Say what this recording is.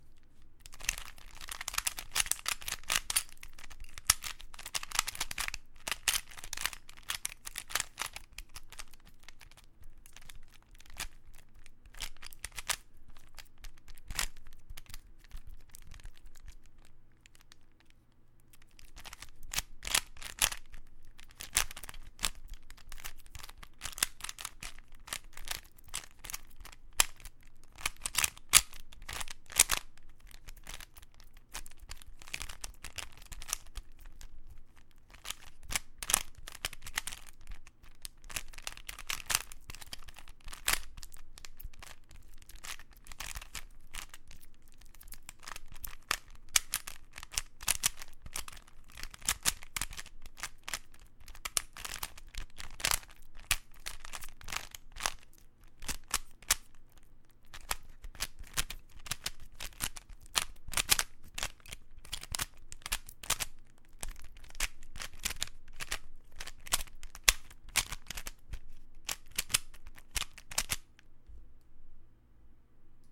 Me doing a quick solve of a 3x3x3 Cube